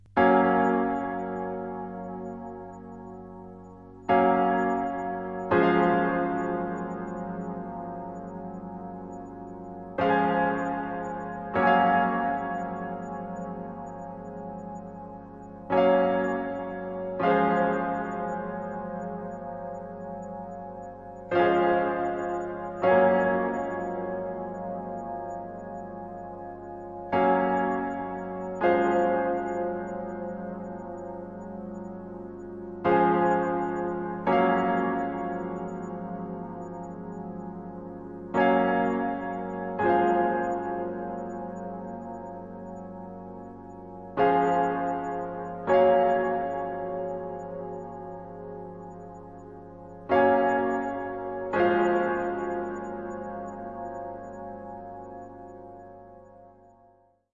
Some piano chords played on my Casio synth. (That record-tapeish piano again! I just can’t get rid of it!)